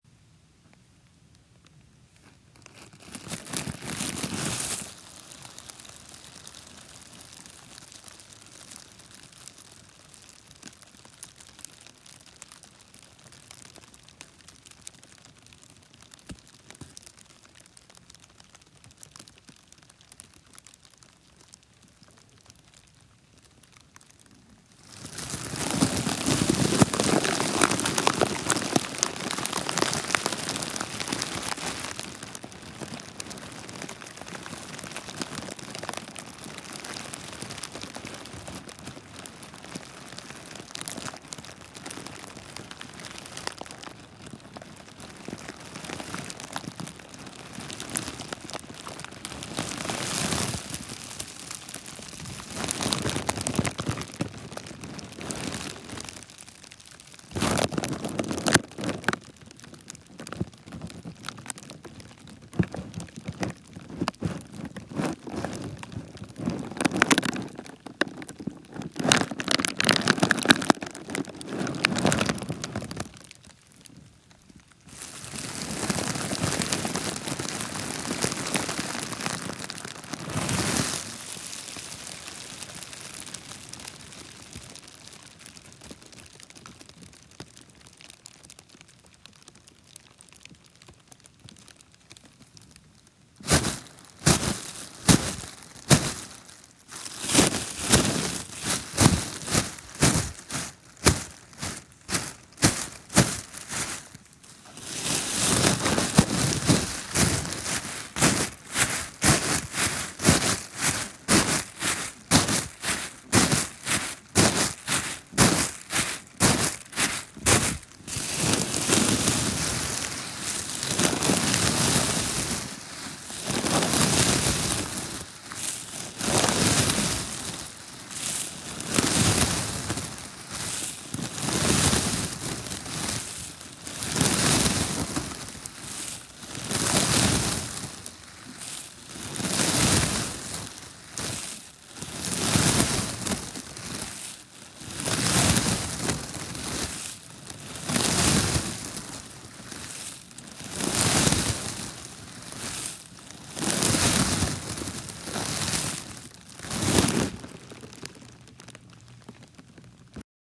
Plastic grocery bags
You could pretend this what ever you want. It could be fire crackling, a rock slide, walking through snow, an ocean… but it’s just a bunch of Safeway and Walmart grocery bags. Have fun
static, click